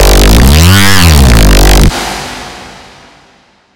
Virtual Riot Growl
Vitual, Growl, Riot, Bass